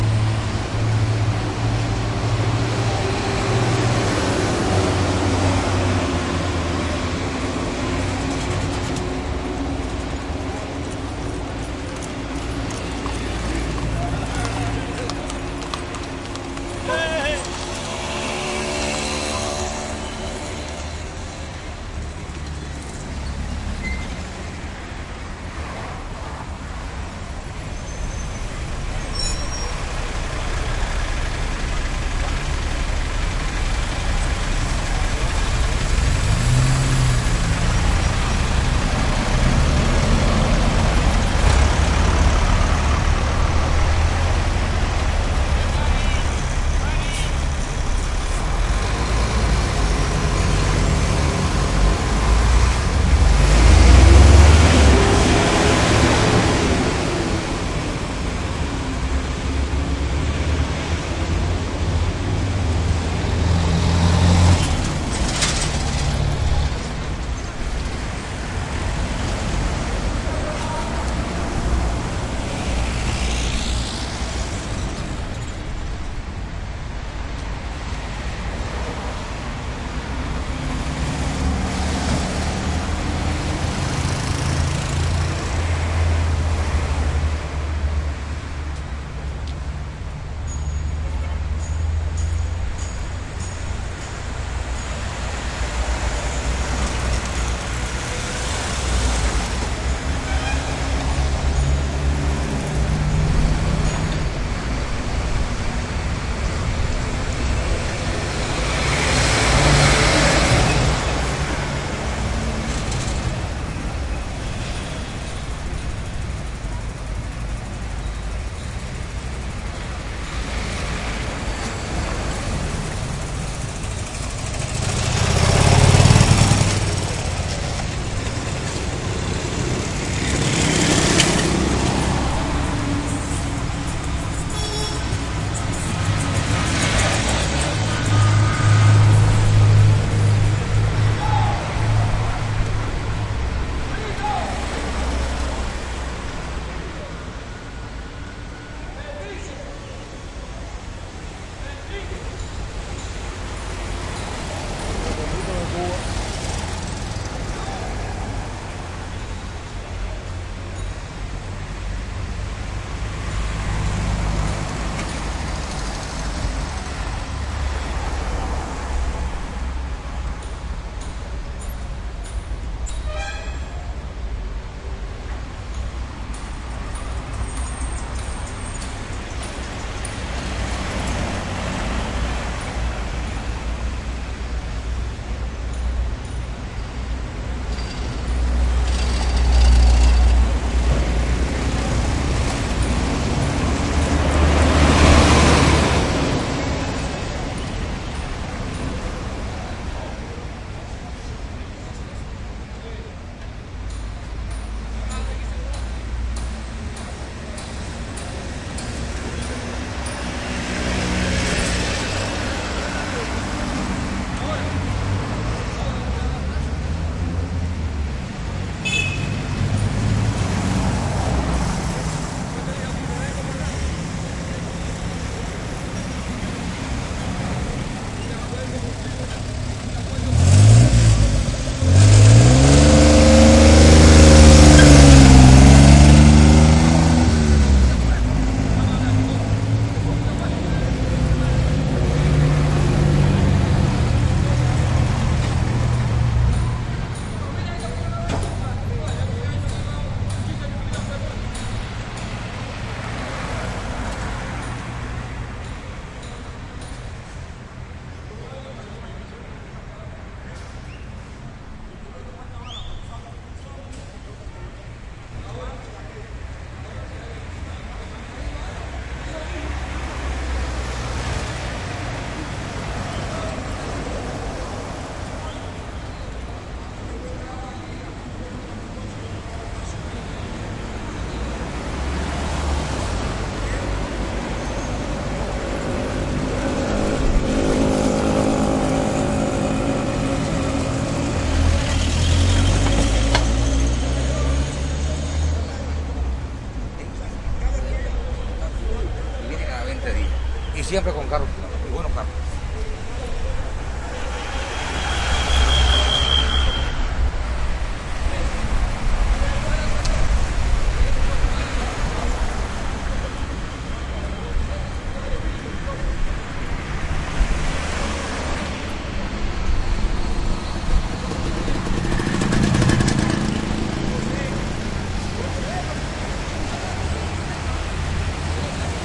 traffic med street cuba1
traffic along big boulevard in Havana